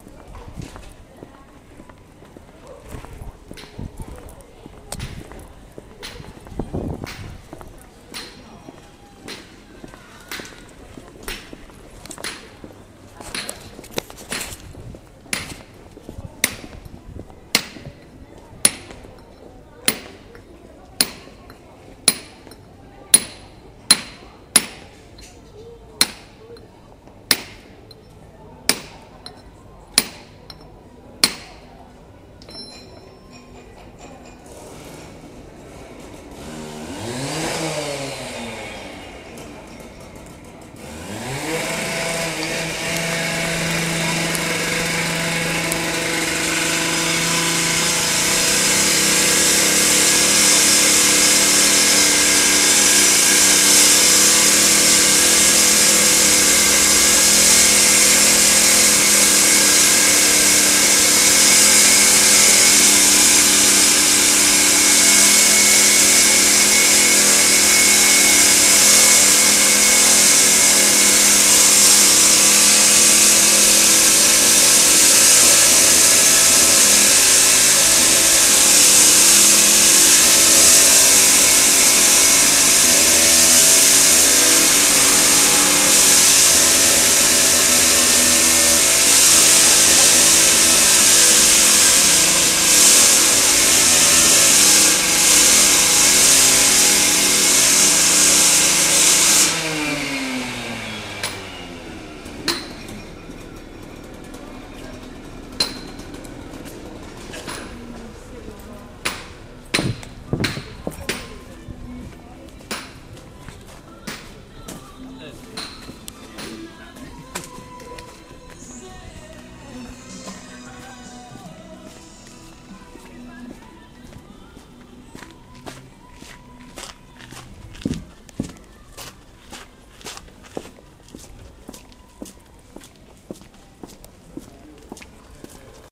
Walking through the streets of central Gothenburg to record urban sounds, I happen across a construction site. I walk past it and onwards to record footsteps on the pavement and eventually some light traffic and trams. Recorded with iAudio X5 internal mic.